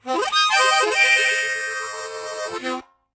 Bb Harmonica-4
Harmonica recorded in mono with my AKG C214 on my stair case for that oakey timbre.
bb, harmonica, key